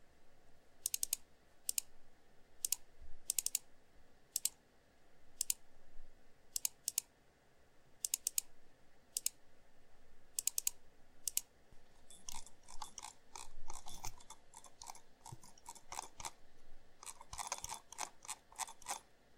Various speeds of mouse clicking and scrolls.